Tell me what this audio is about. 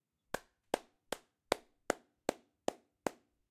A short soft repeated slow clapping audio take